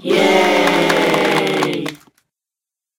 Very unenthusiastic and unimpressed crowd clapping and saying yay. Recorded in Garage Band (Layering me saying "yay" and clapping in a library) using a Mac's Built-in Microphone. For use as a sarcastic audience.
cheers, claps